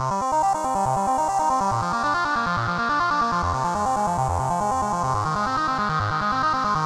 Synth with flange fx and compressed.